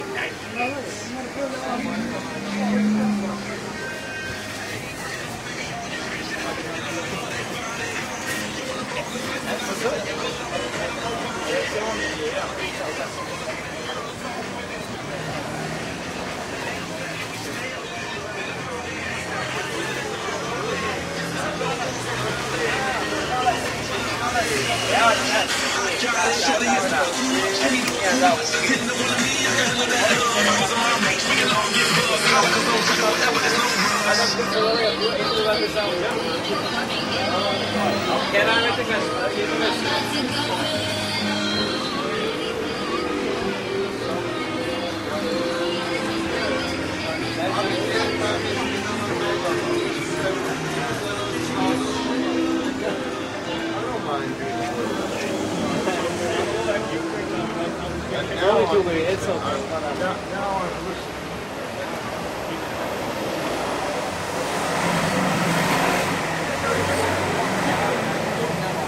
Turkish busineses Danforth Avenue Toronto
Recorded with a Roland R05 and accessory microphone as I walked along Danforth Avenue, west of Greenwood Avenue in Toronto. Walking past several Turkish businesses, soon after nightfall during Ramadan.
Canada, field-recording, night-fall, Ramadan, Toronto, Turkish-market